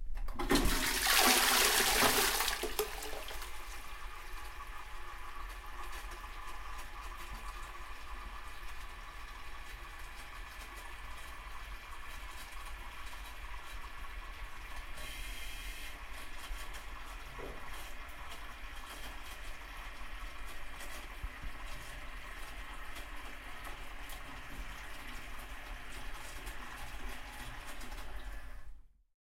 Toilet flushing 4
A recording of a toilet being flushed.
bathroom, flush, flushing, Toilet, toilets, washroom, water